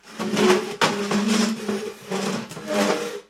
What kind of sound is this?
chaise glisse9
dragging a wood chair on a tiled kitchen floor
chair, dragging, floor, furniture, squeaky, tiled, wood